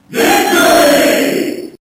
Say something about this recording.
A crowd screaming victory! Down-samples to 8-bit resolution.
crowd, nintendo, vocal, nes